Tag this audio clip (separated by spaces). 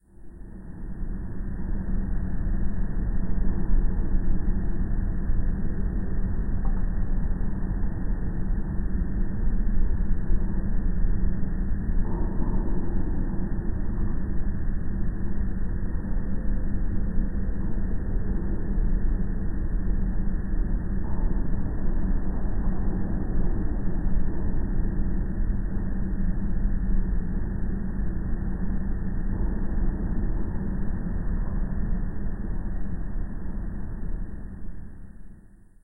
atmosphere cosmic eerie futuristic haunting interstellar ominous sci-fi space spacecraft spaceship starship technology travel unsettling